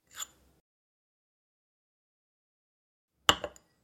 Pickup and put down Mug on a dish
Foley I recorded of a mug that was on top of a dish. The sound is the picking up and putting back down the mug.
dish, pickup